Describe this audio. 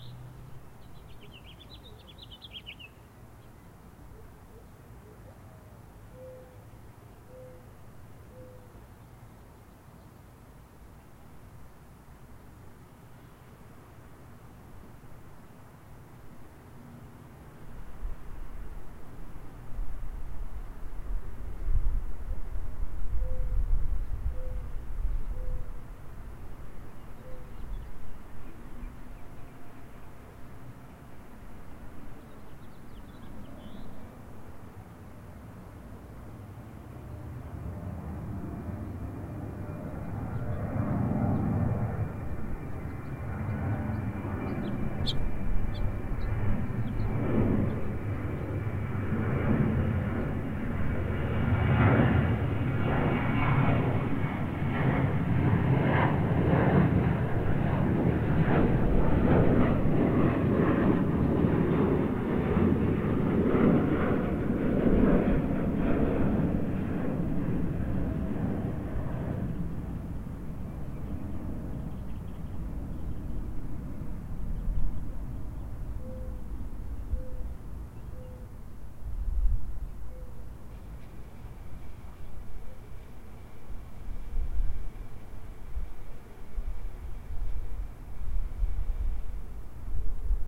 Airplane pass from rooftop